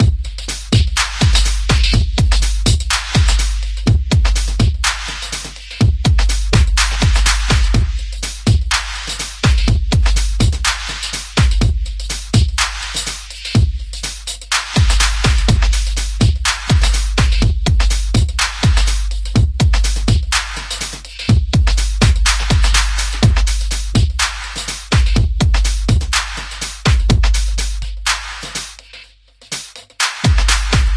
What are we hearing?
Dubby Beat
This is probably the closest I will ever get to making a Dubstep beat
124, 124bpm, Beat, bpm